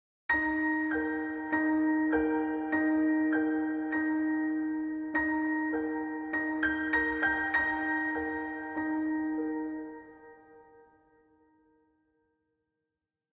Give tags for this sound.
puppet; Dance; movement

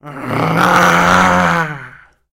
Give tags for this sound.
Groan; Moan; Quejido

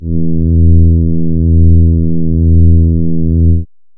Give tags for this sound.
brass; horn; synth; warm

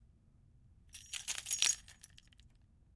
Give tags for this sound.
car-keys; jangle; janglin; keys

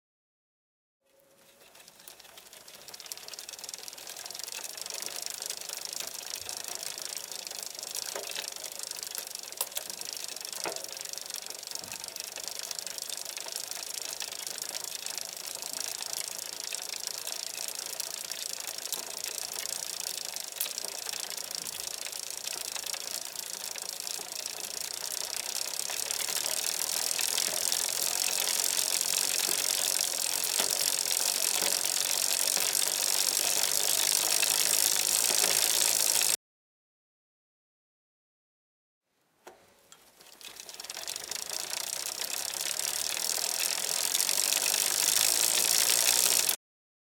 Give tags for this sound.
acceleration; bicycle; bike; chain; cycle; forward; freewheel; mechanical; metal; mtb; pedaling; raw; ride; rider; speed; stereo; various; wheel

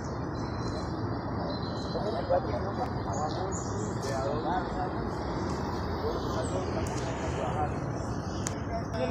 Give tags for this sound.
Bucaramanga; conversacion; Parque; Santander

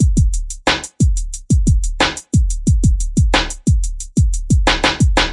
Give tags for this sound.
stabs; rap; dancing; dance; radio; trailer; stereo; instrumental; broadcast; interlude; disco; pbm; music; sound; sample; chord; part; background; loop; drop; hip-hop; intro; beat; club; mix; podcast; jingle; pattern; move

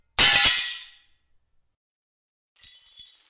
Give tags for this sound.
break-glass; broken-glass; glass; glass-shatter; shards; shatter-glass

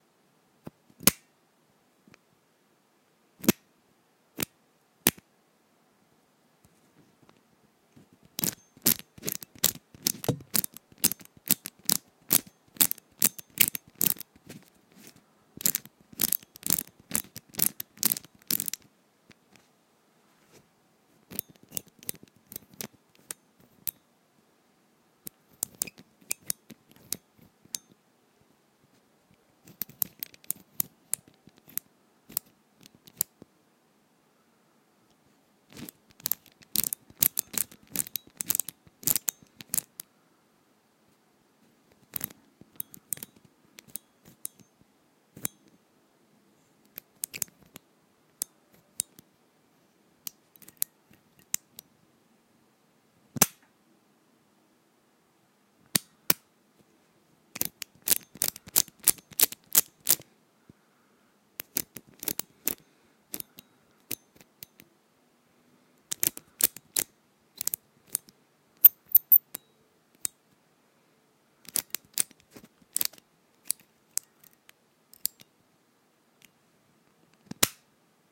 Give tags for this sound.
combination-lock; combo-lock; lock; lock-close; lock-open; lock-sounds; lock-tumblers; tumblers

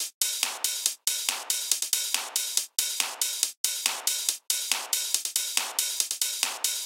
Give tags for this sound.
dance; processed; electronica

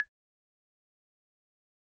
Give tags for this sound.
africa; instrument; percussion; phone

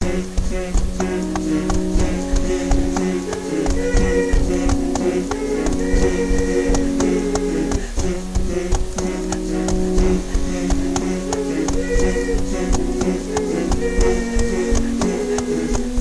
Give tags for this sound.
acapella; acoustic-guitar; bass; beat; drum-beat; drums; Folk; free; guitar; harmony; indie; Indie-folk; loop; looping; loops; melody; original-music; percussion; piano; rock; samples; sounds; synth; vocal-loops; voice; whistle

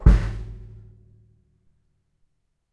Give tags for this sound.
bass; drum; Kick; Tama

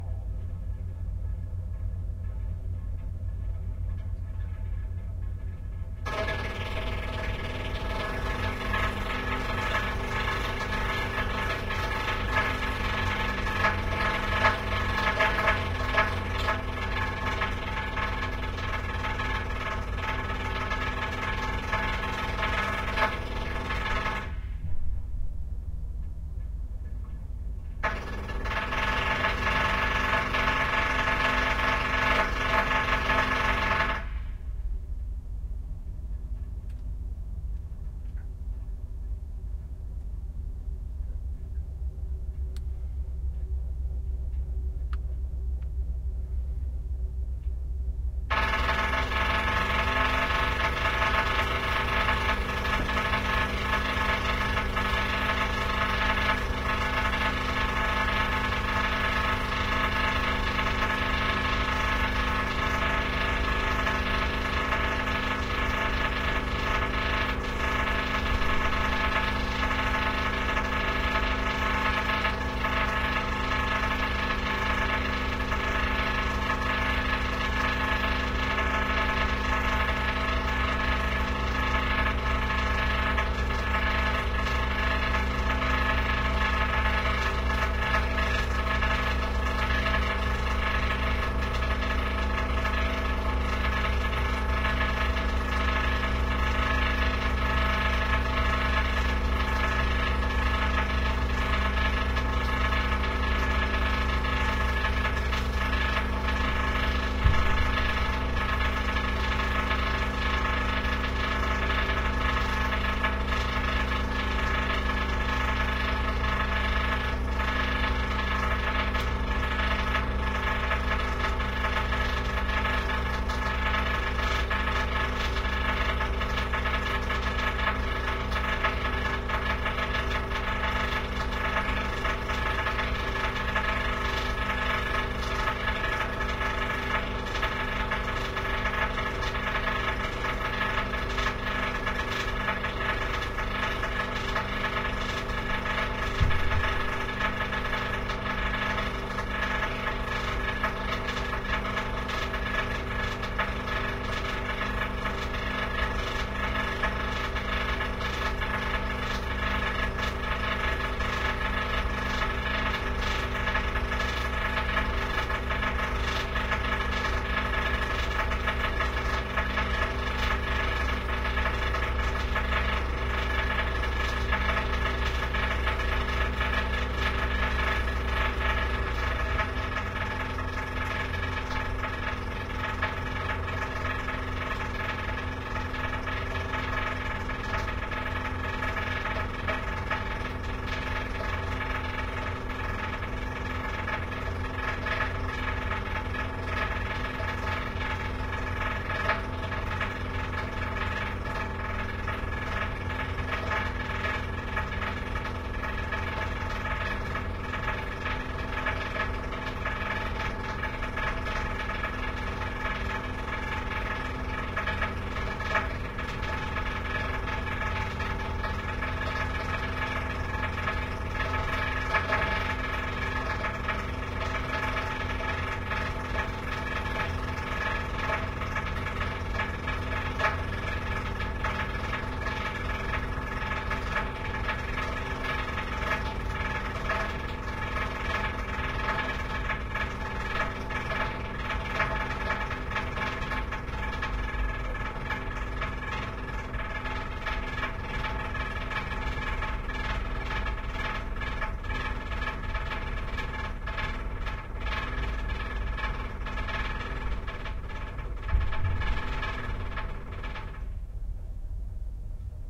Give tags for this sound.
bridge; drone; sub-sea; barge; drilling; hammering; drill; building; sea; noise; construction; builders; build; constructing

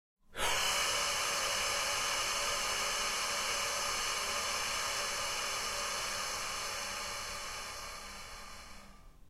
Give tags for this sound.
processed; shocked; air; noise; tension; granular; suspense; wind; breath; shock

